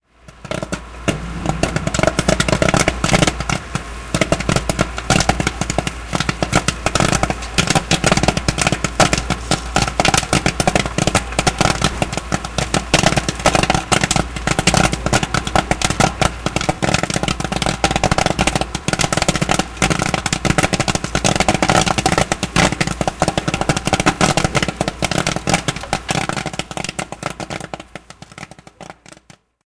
Zoom H2N recording of popcorn machine making popcorn
Machine, Popcorn, Popping